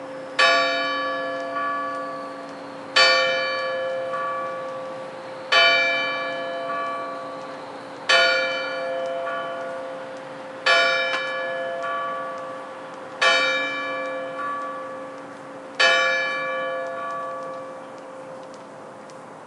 Church Clock Strikes 7
The church bell strikes 7 oclock
church, church-bell, bell, clock, bells, ringing, cathedral